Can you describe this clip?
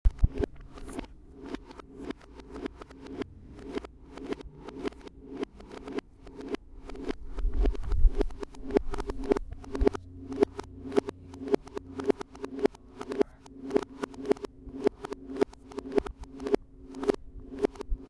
Reverso de algo
Something accidentally recorded in reverse Zoom H1
mental, reversed, sad, wierd